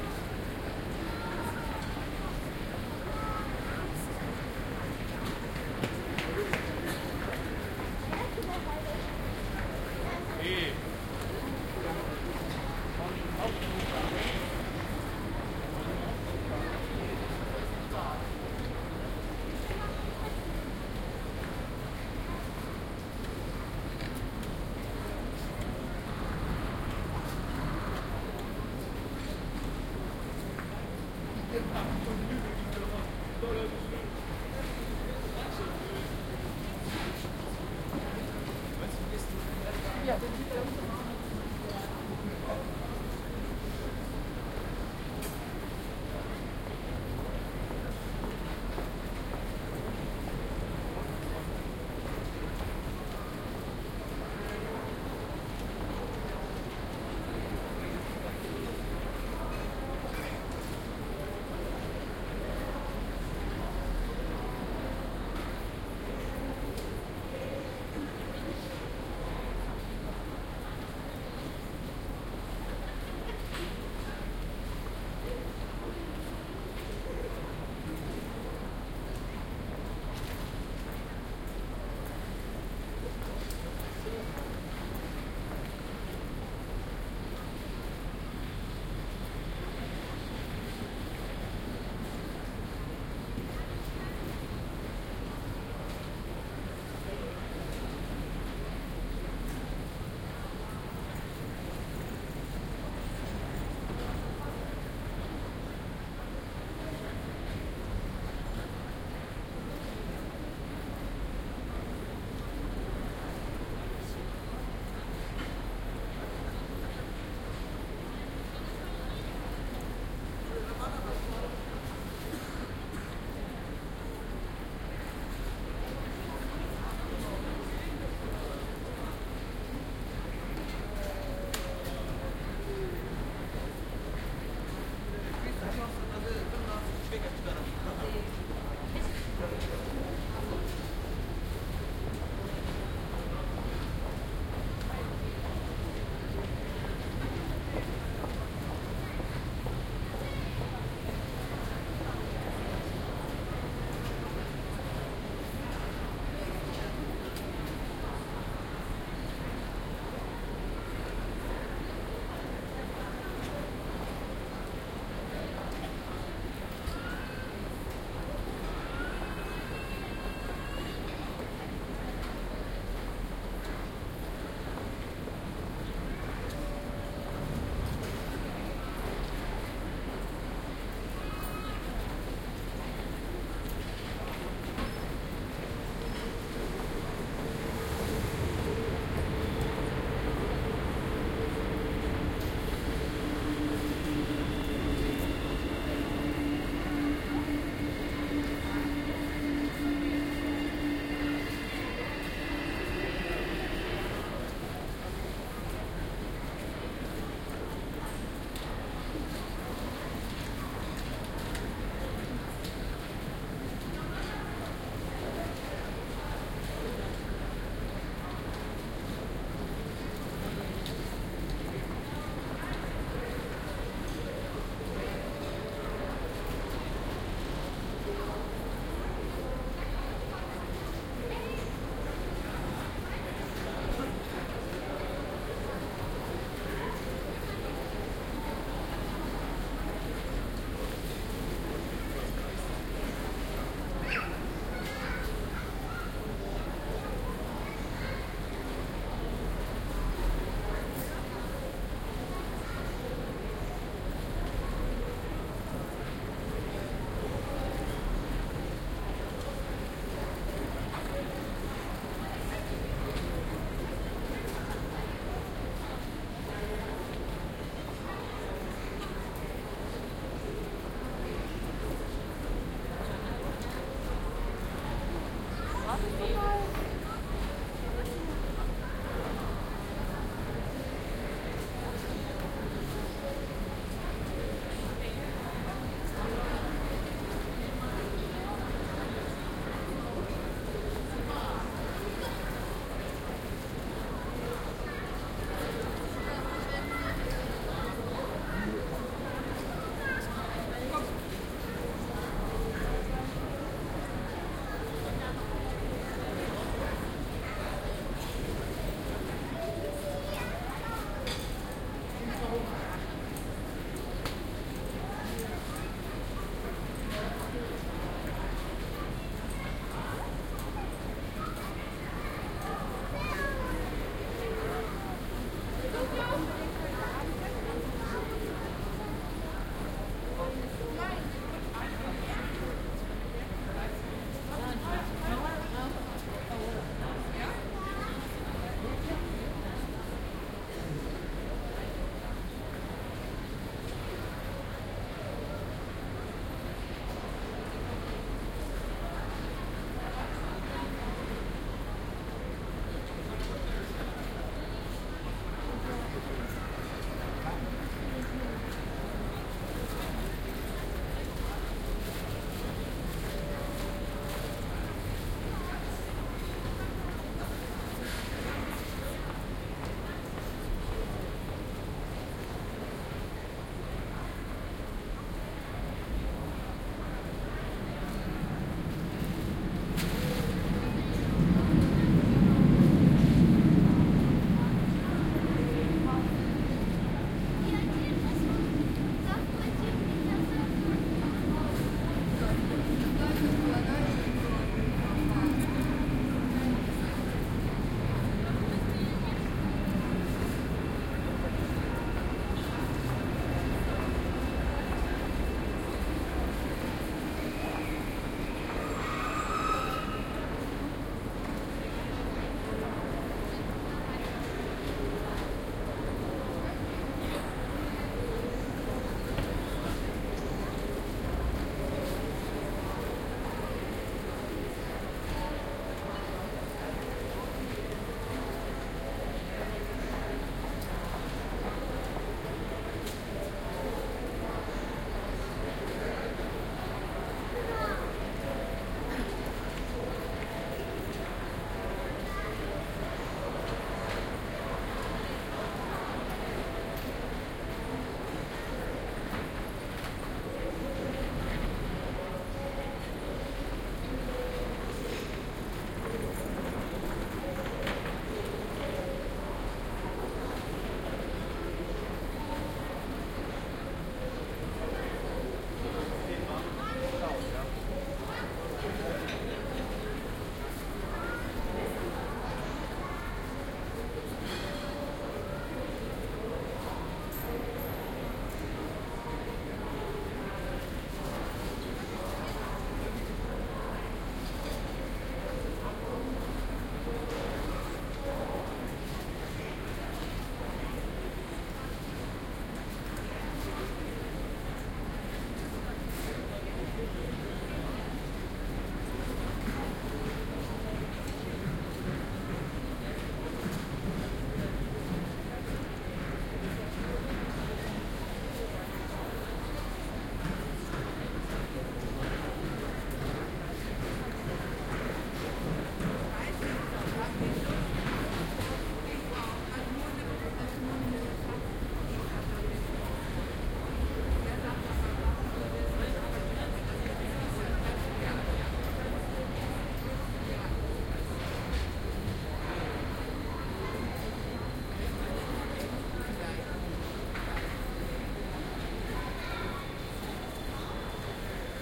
Binaural recording of Hanover railwaystation, but not on a platform. Olympus LS-10 recorder, Soundman OKM II classic microphones (studio version) and A3 adapter.